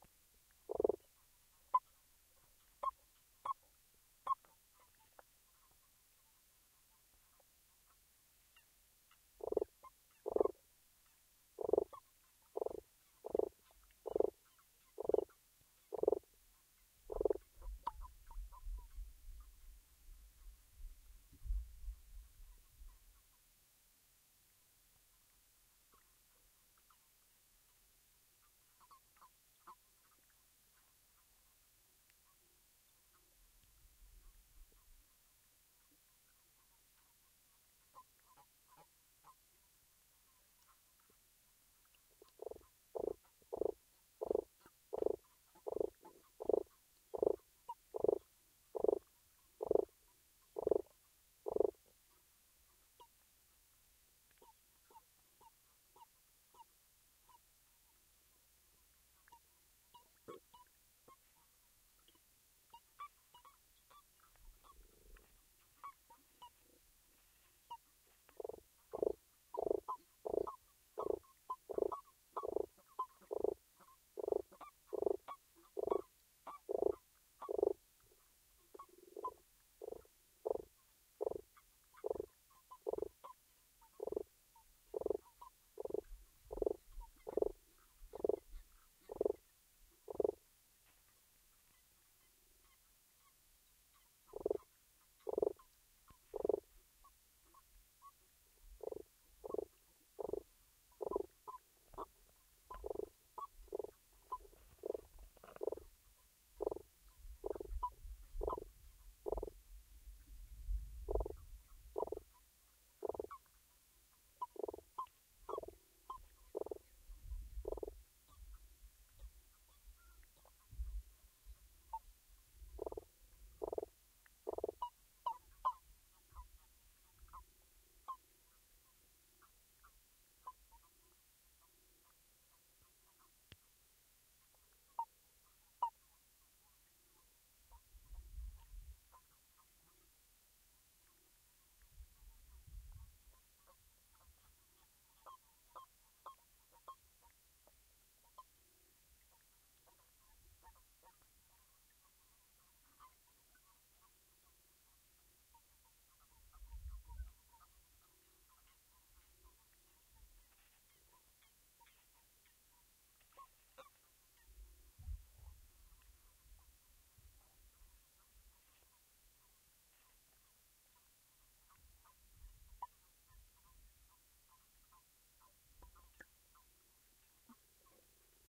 (HYDRO) Single frog vocalizations in a pond
It's mating season and there are lots of frogs in this pond. Managed to isolate one frog croaking, with several others squeaking in the background.
Stereo recording made with JrF d-series hydrophones into a Tascam DR-100mkiii thru Hosa MIT-129 Hi-Z adapter.
croak, field-recording, frogs, frog, pond